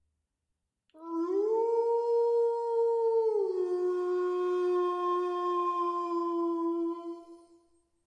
Wolf howl
dog, howl, howling, werewolf, wolf, wolves